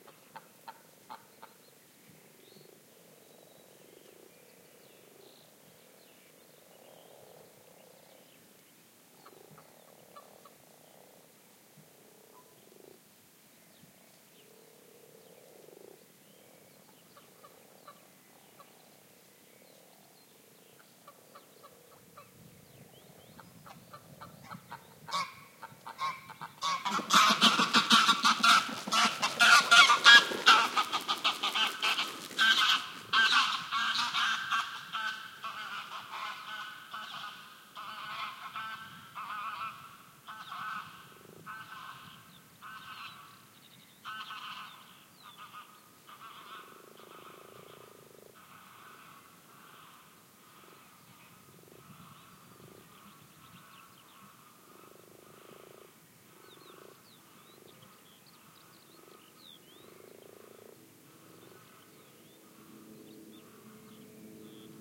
Imaging it's early in the morning about 4am in the summer. It's pitch black around you at a small pond in the middle of the forest. There is no civilization the next 5 kilometers around you. You can't see the hand in front of your eyes. Suddenly a few geese are waking up, get scared and fly away. That was such a shocking moment! O.O
Recorded with two Rode M2.